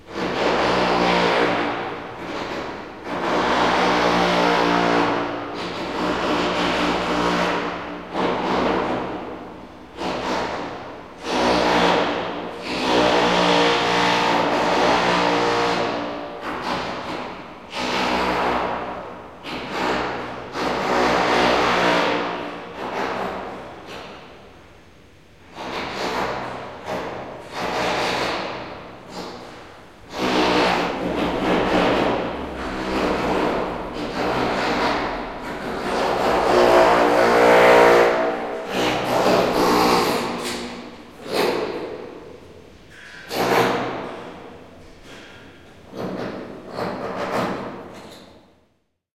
2016-11-17 horrible sound
Just an obnoxious construction sound in my apartment building. Recorded in the tile hallway with the perspective getting a little bit closer over the course of a minute. Recorded using internal mics of a Tascam DR-44WL. Edited in Samplitude.
buffer, grinder, spaceship